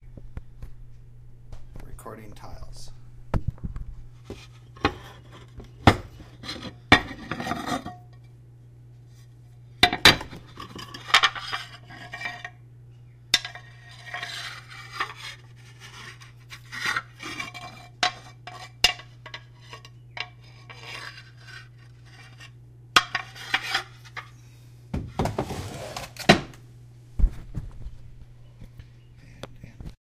sorting thru some clay tiles with a bit of dirt